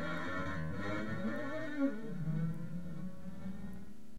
String noise
Recorded through audacity on linux. Making noise with strings.
experiment, guitar, noise